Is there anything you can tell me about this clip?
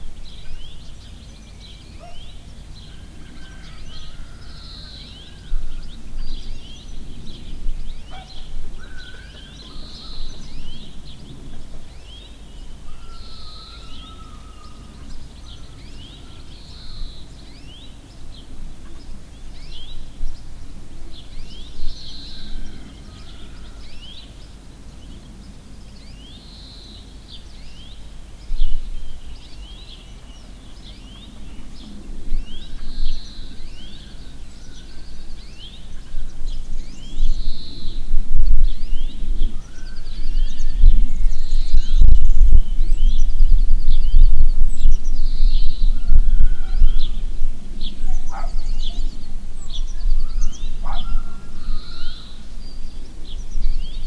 Village at morning
recorded outside of village in Czech republic by Audiotechnica condeser mic.
birds, dog, domestic, enviroment, life, morning, nature, outside, roster, street, village